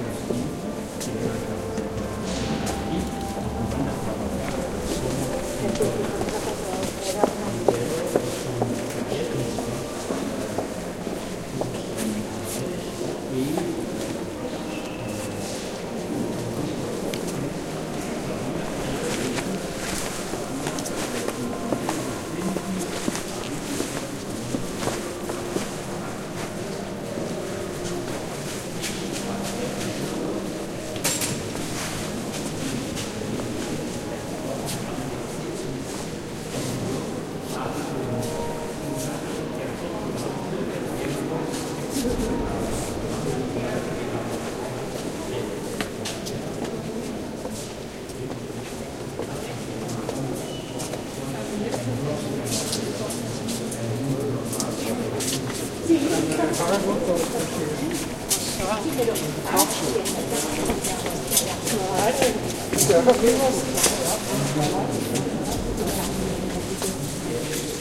20100402.Gent.Sint Baafskathedraal.02
ambiance inside Saint Bavo Cathedral (Sint Baafskathedraal) in Gent, Belgium. Feet dragging, heel tapping, quiet talk. Olympus LS10 internal mics
cathedral, ambiance